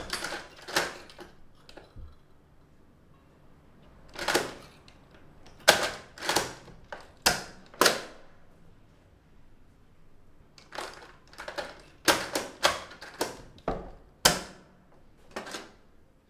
heavy old keys working on door lock
lock, door, keys, heavy, old